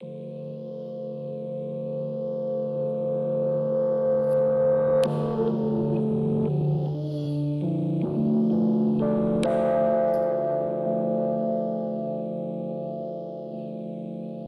Some Rhodes played backwards and forwards or something like that. Made for the Continuum 5 dare thing.
manipulated, electric-piano, ambient, Continuum-5, rhodes, chords, atmosphere